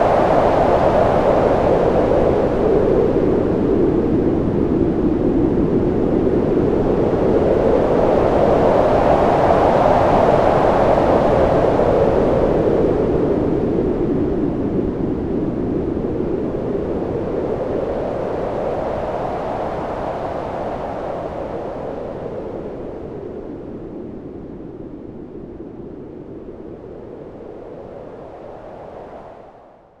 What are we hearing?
A great sound that I produced in Audacity using the wah wah effect after using white noise. It sounds rather like a windy and stormy wind approaching!